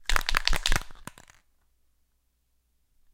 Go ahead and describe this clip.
Spray Paint Shake Four
Shaking a spray paint can four times